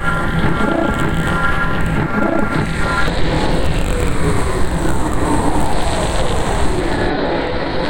2-bar ambient loop; sustained bright pad; made with Native Instruments Reaktor and Adobe Audition